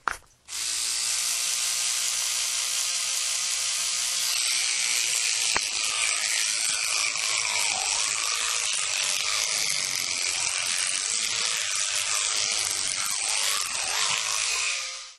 Activating and using
vibrating electric toothbrush.
close, dental, mechanical